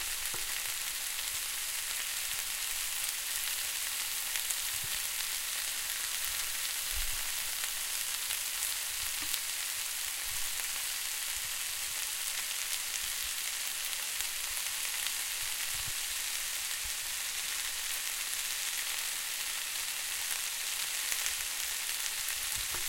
!rm frying food2
Cooking some food recorded with Zoom H4n recorder.
cook, cooking, fire, food, fry, frying, heat, pan, steak, stove